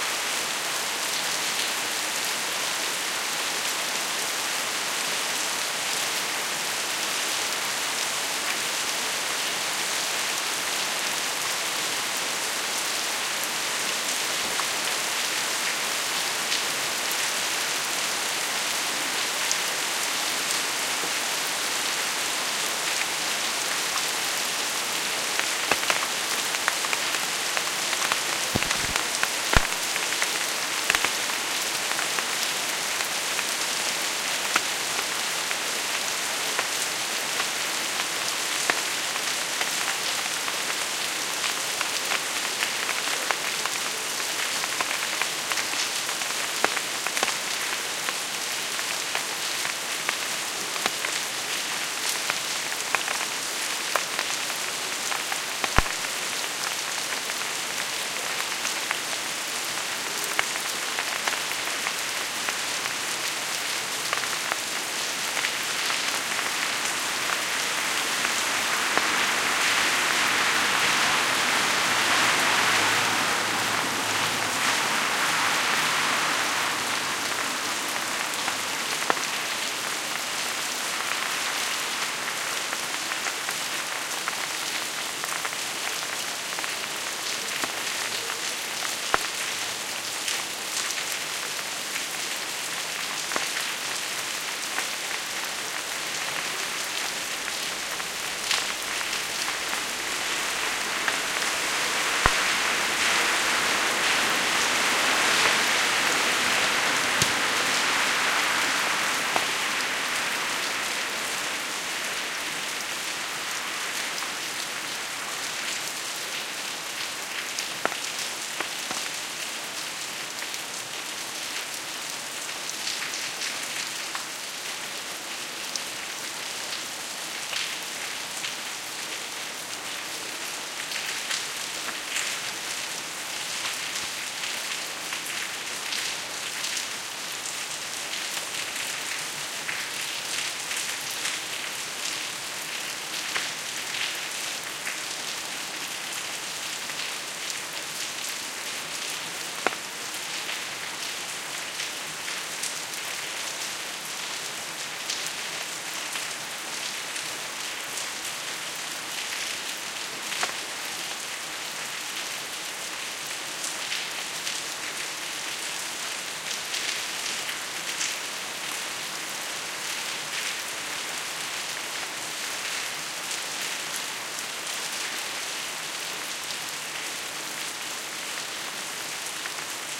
longish record of a hard rainfall as heard from my balcony. A couple cars pass along the street splashing in the puddles Sennheiser ME66+ AKG CK94 in M-S stereo / lluvia desde mi balcón, dos coches pasan chapoteando en los abundantes charcos